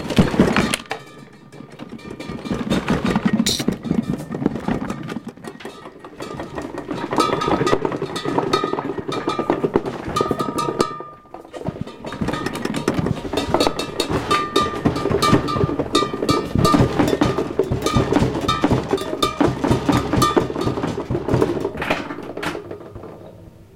Sounds For Earthquakes - Glasses in Closet 1
I'm shaking a wooden closet filled with glasses. My mom would have killed me if she saw me doing this. Recorded with Edirol R-1 & Sennheiser ME66.